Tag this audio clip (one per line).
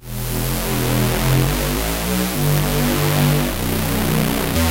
acid
bass
club
dance
dub-step
electro
electronic
house
loop
rave
saw
synth
techno
trance
wave